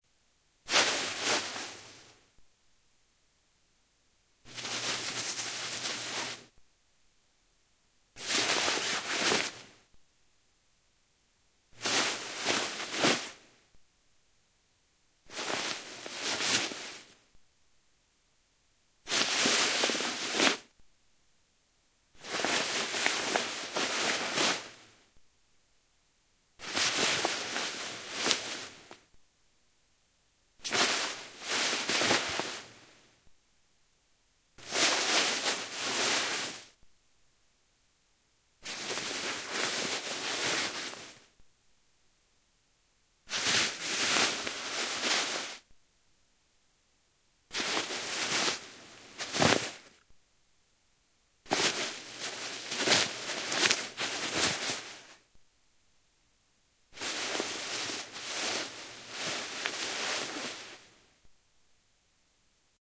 Clothing Rustle Cotton
jacket, foley, cloth, rustle, Nylon, clothing, clothes, dressing, cotton, acrylic, movement, handle